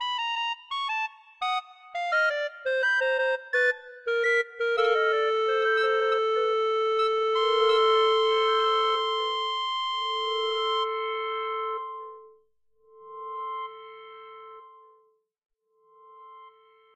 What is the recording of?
Clarinet-1-Tanya v
clarinet, for-animation, sound